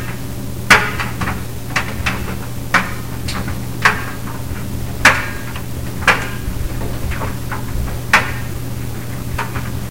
Dryer loop (belt buckle clacky)
Laundry day!
Running clothes dryer with belt buckle and possibly a zipper or two making a bit of a racket in with the clothing.
Part of my Washer-Dryer MacPack.